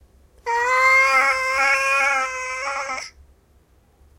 A meow recorded by a Tascam DR100 mkII portable recording. The cat was at half meter from the directional mics and there is some background noise.